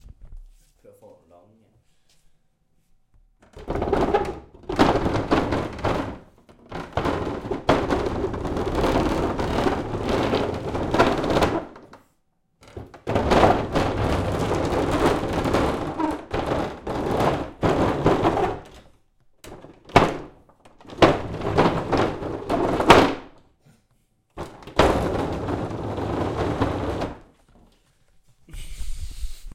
table scrape2
More scraping... you never know. Recorded indoor with a zoom H4 and a sennheizer long gun microphone.
table, scrape